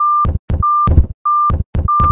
Sonido 2 alto ejercicio 2
acute, beat, grave